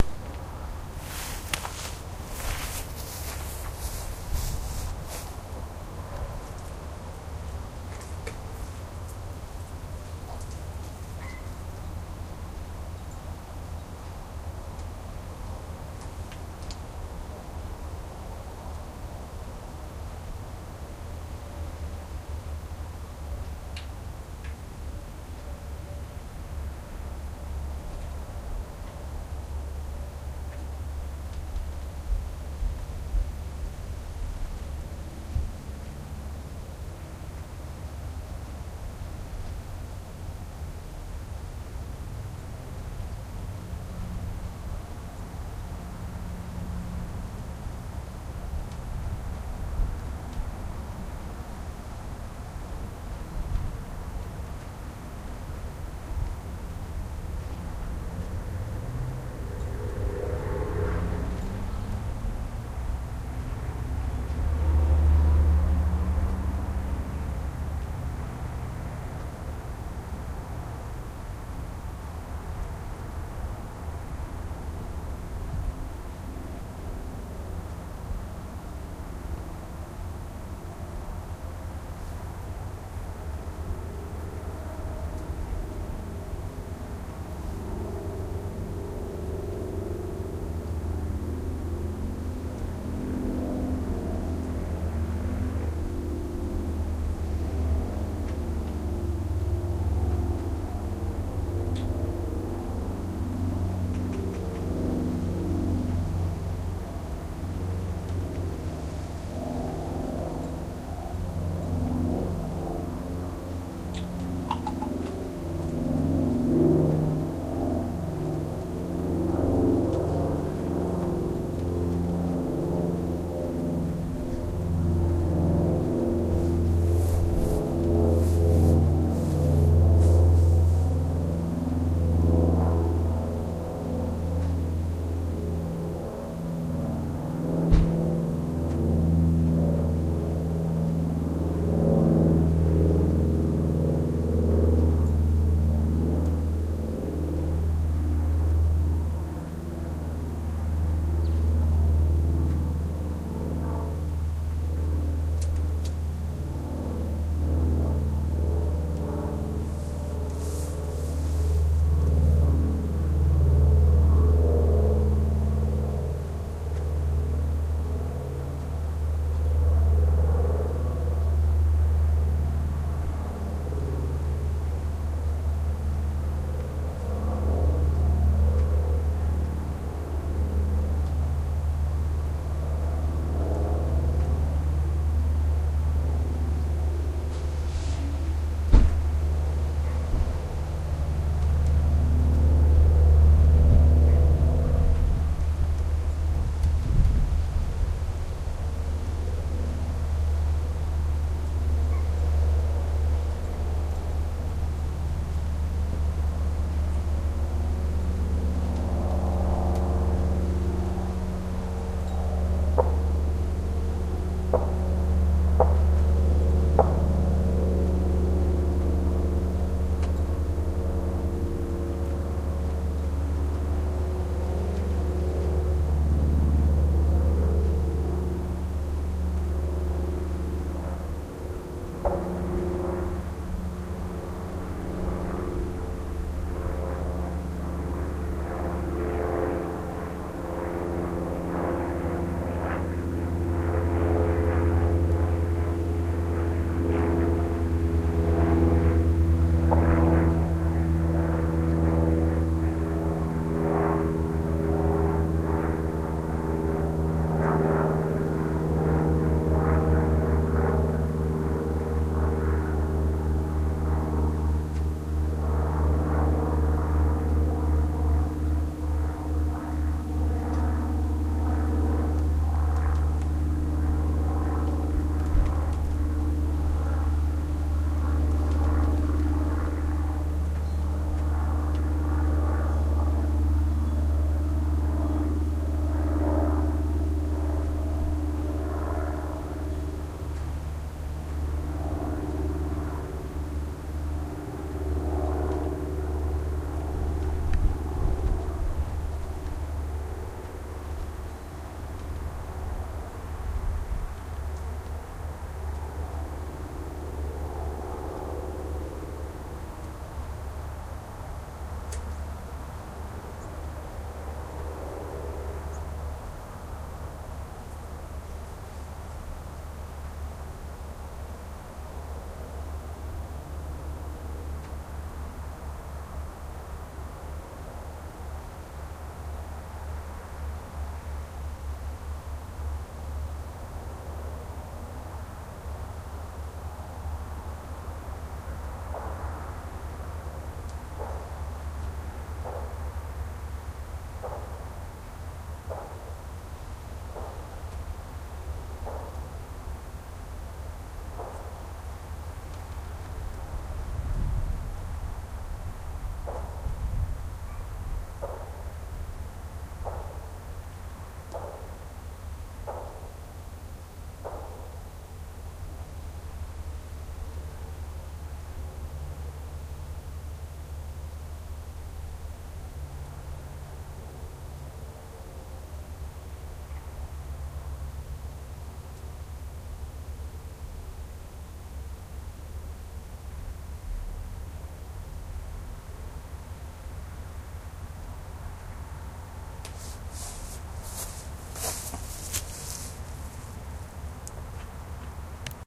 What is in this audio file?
raw airplanes
I think these are the recordings I remember making in Vero Beach FL. I kept hearing gun shots coming from the west and assume they were wind blown sounds of outdoor gun range somewhere near there. Then the landscapers started with the lawnmowers.
birds, field-recording, gun, plane, wind